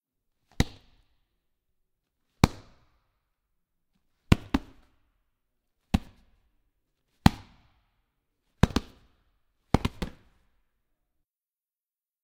Hits to the mat
box, boxing, mat, Hits